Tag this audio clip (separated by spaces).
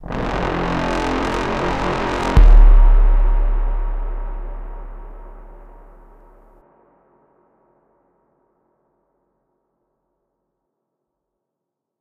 Creepy Suspense Horns Cinematic Bass Trombone Horror FX